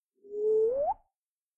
S Spawn Whoosh
whoosh effect of player spawning
appear, effect, magic, player, whoosh